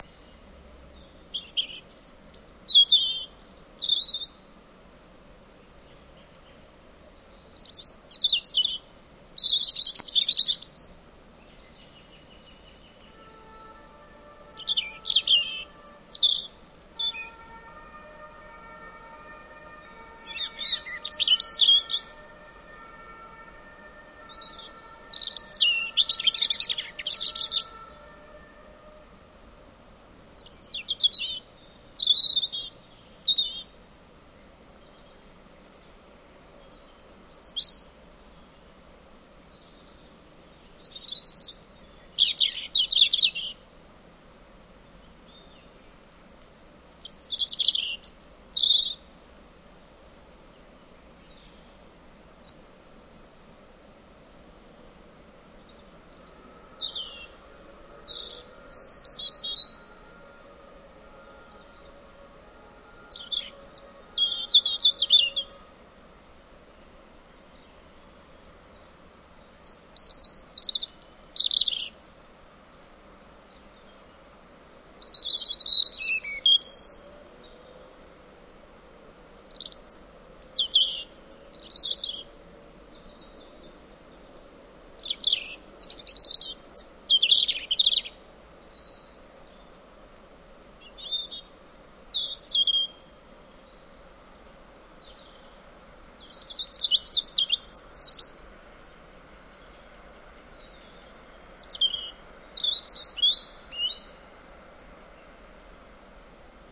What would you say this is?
Robin at springtime in a forest north of Cologne, Germany.
Unfortunately a fire brigade is coming along during recording. Sony Datrecorder, Vivanco EM35.